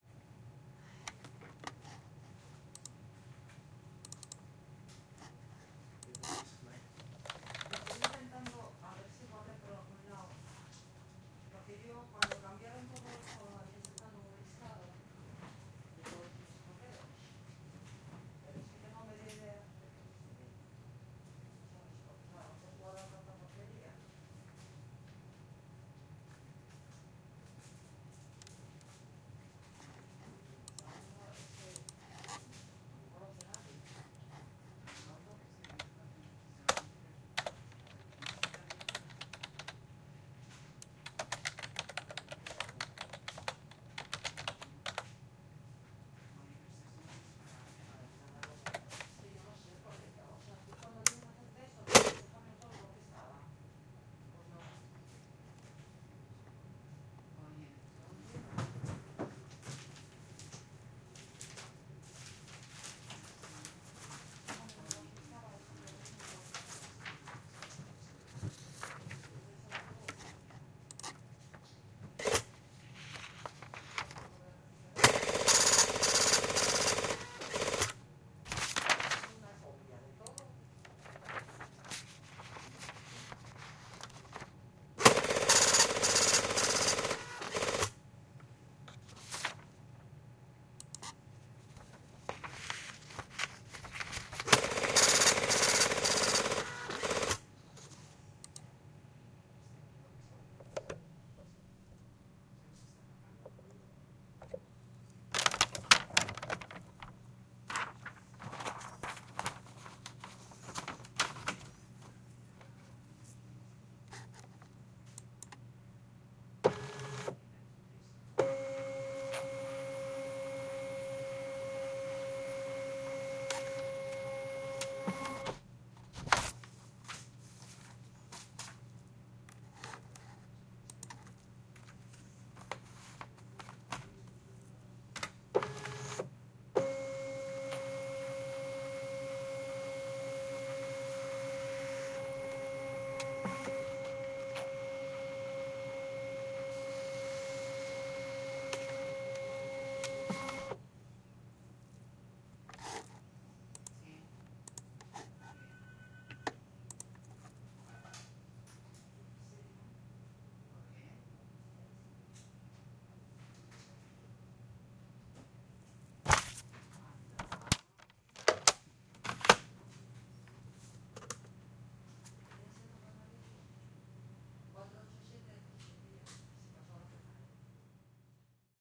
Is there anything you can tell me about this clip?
Office environment
General atmosphere inside an office. Numerous sound elements such as photocopiers faxes, mouse movements, computers etc ...